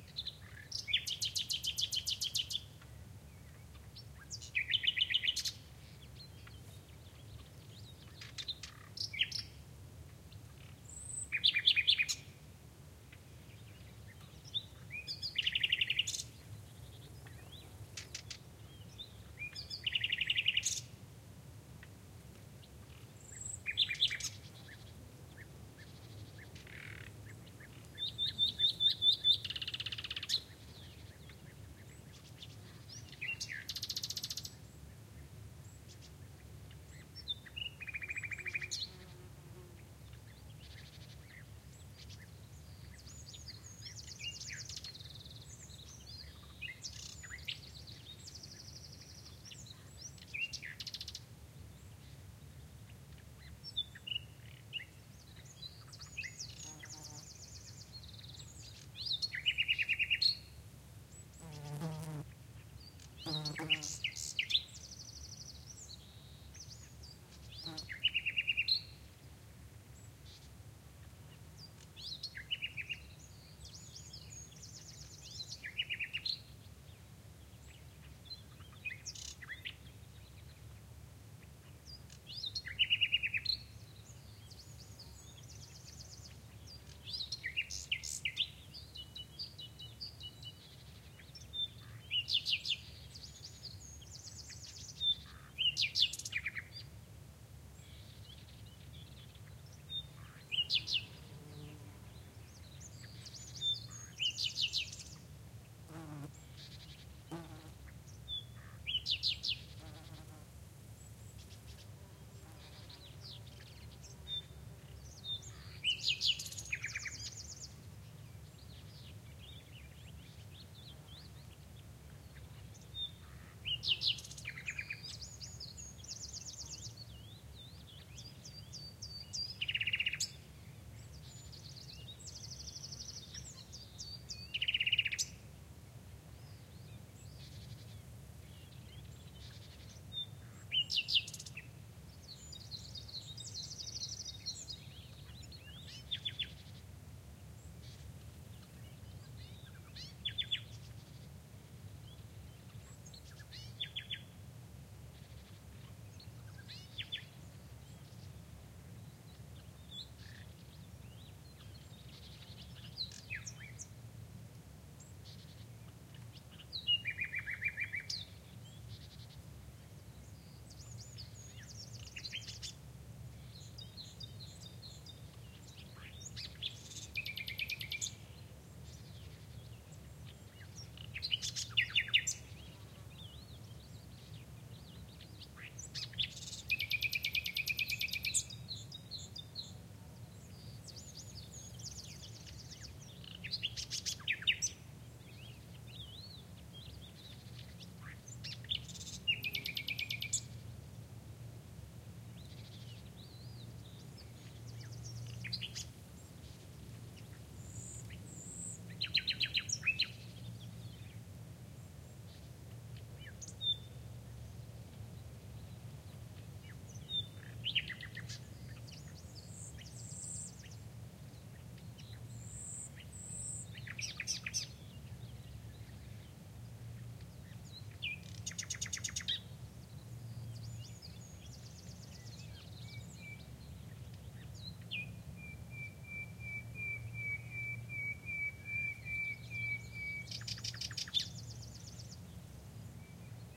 A Nightingale sings in the morning, not very close. Bee-eater, Great Tit and Serin in background. A fly buzz near the mics. Shure WL183 mics into Fel preamp, Edirol R09 recorder
ambiance, buzz, field-recording, insect, nature, scrub, south-spain, spring